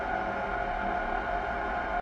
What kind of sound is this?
Industrial Strings Loop Noise 03
Some industrial and metallic string-inspired sounds made with Tension from Live.
dark-ambient, industrial, metallic, strings